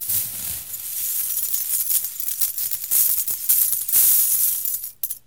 Coins Pouring 06
A simple coin sound useful for creating a nice tactile experience when picking up coins, purchasing, selling, ect.
gaming, Purchase, Realistic, Game, videogame, Gold, indiegamedev, Video-Game, indiedev, Sell, Coin, games, Money, Currency, Coins, videogames, gamedev, sfx, gamedeveloping